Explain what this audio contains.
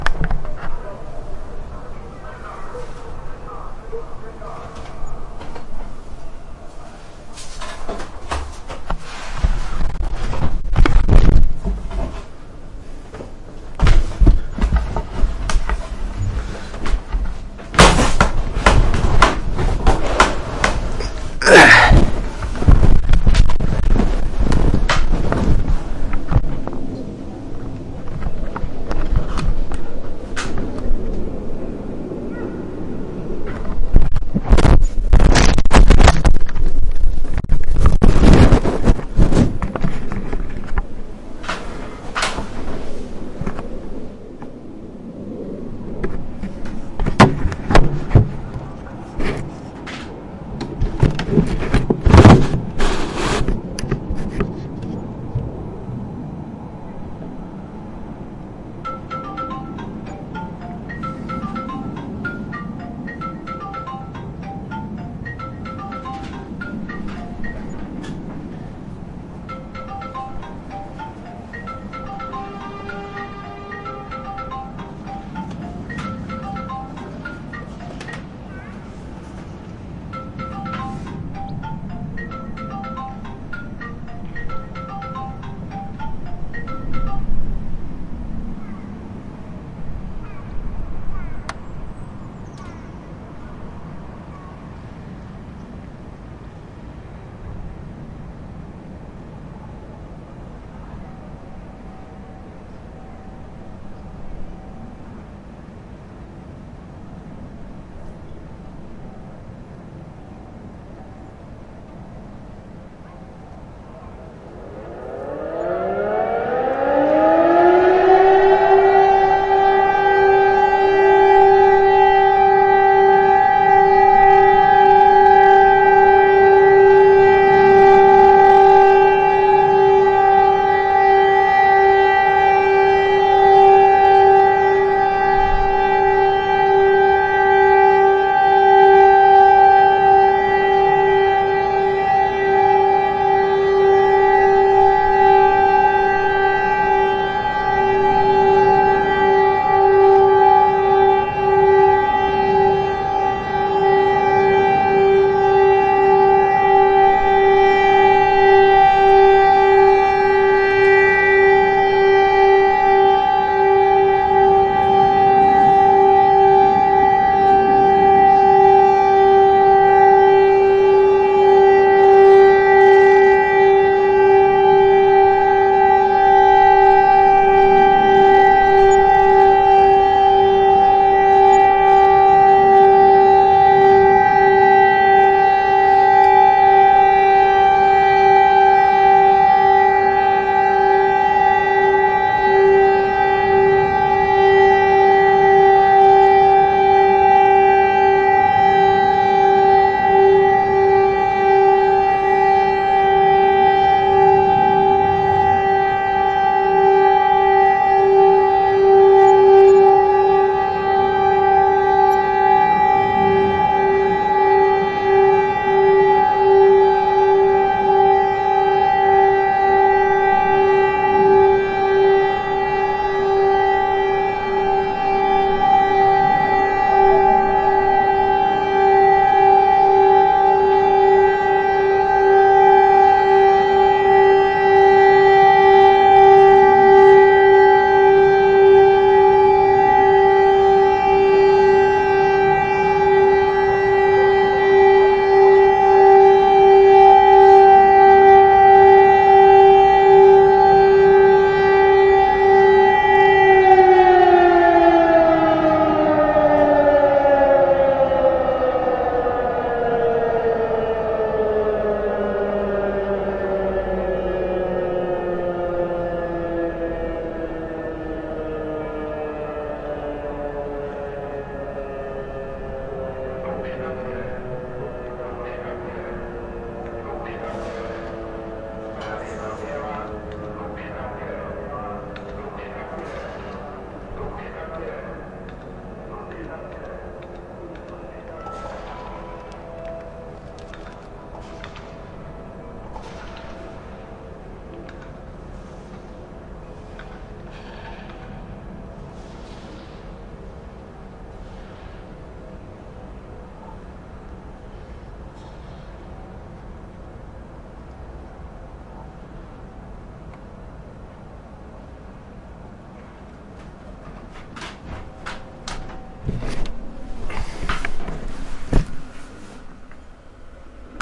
Siren test, Prague 10 - Vrsovice, Czech Republic (Zkouska siren)
Recorded on SONY PCM-D50. The federal sirens are tested every first Wednesday of the month.
Aleff